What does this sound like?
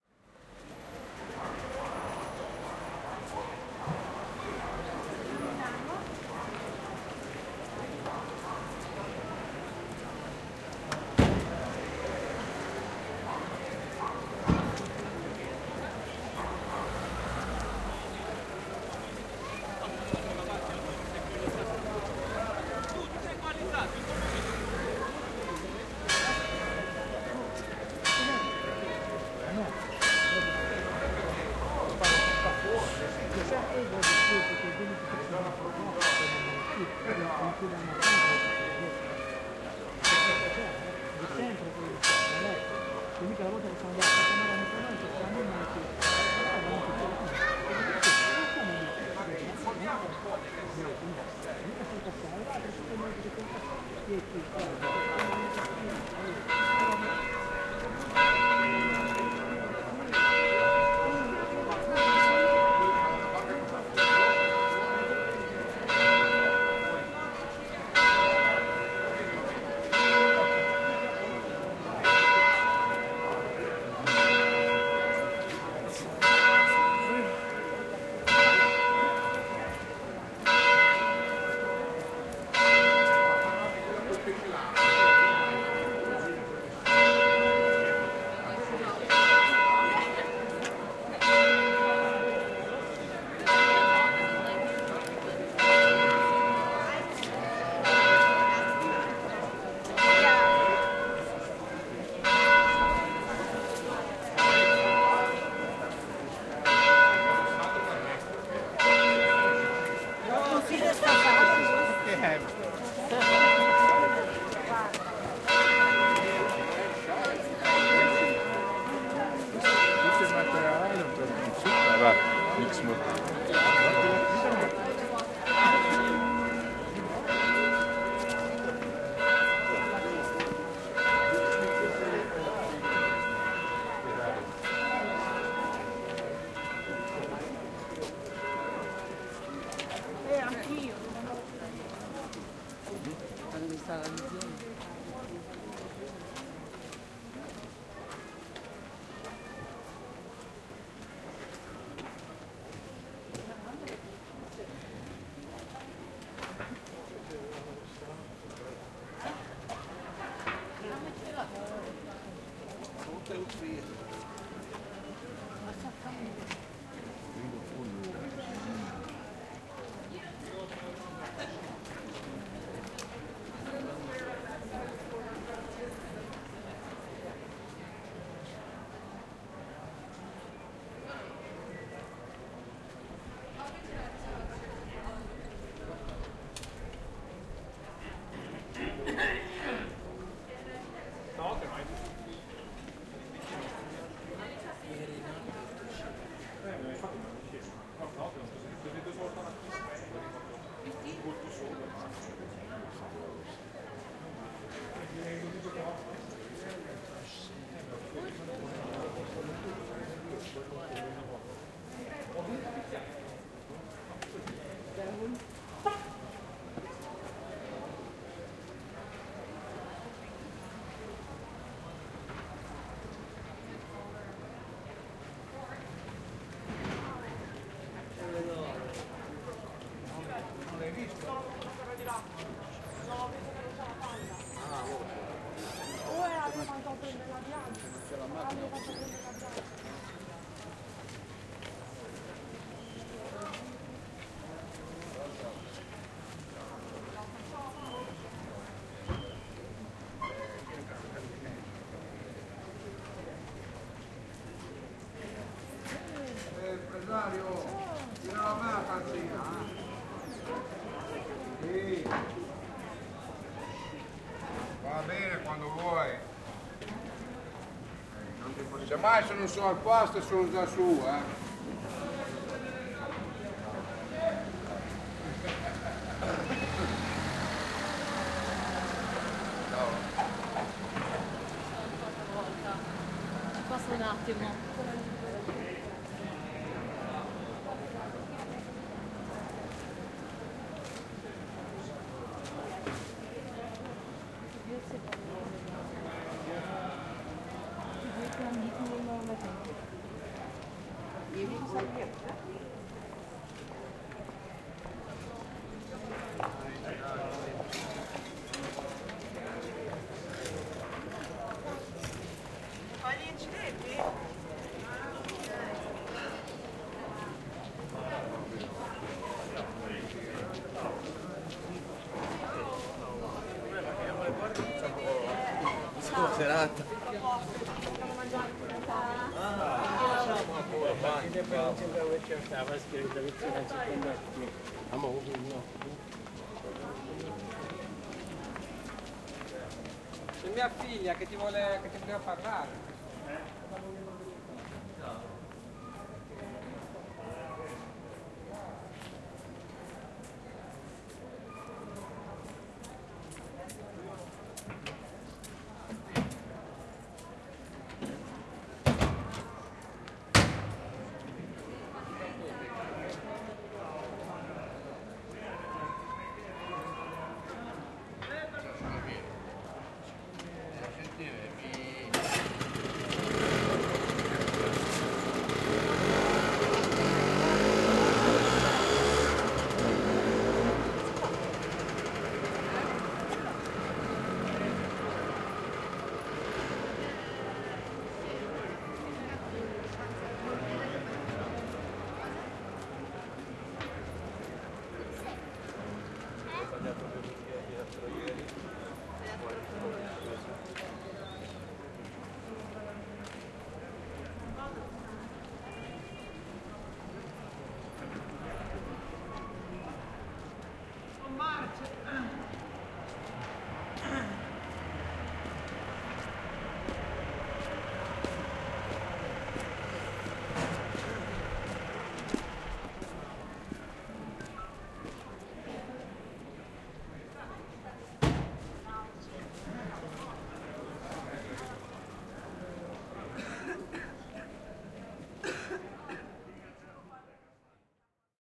A walk around Monterosso, Cinque Terre, Italy. 2015-04-07.
Recorded with a Zoom H6, XY mic @ 90º setting.
- joaquin etchegoyen
bay, bell, cinque-terre, crowd, italy, monterosso, people, sea, tourists, water